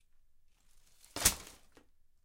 Sticks Thrown To Floor v3
Sticks thrown roughly onto floor. Recorded indoors on an AudioTechnica condenser microphone.
indoors, wooden, wood, sticks, impact, bundle